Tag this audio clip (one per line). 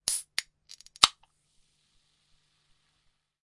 carbonated
open
coke
drink
opening
soda
fizzy
pepsi
can
cola